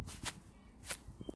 Grass Footsteps
Recorded using a zoom h2n recorder. Footsteps on grass. Edited in audacity.
footsteps, grass, walk